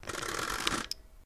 27 washing machine settings
taken from a random sampled tour of my kitchen with a microphone.